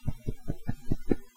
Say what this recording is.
clapping hands with gloves on. I dunno, maybe these would make good soft impact sounds? *shrug*
hand, pat, clap, muffled, glove